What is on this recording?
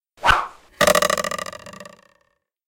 I made this arrow strike sound using a horse whip and a school ruler. Edirol R09 HR with homemade Primo em172 capsule microphone.